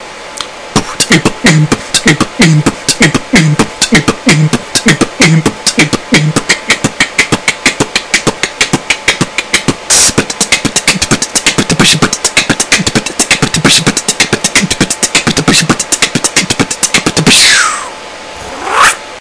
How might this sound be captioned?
generic beatbox 5
5, beatbox, generic, dare-19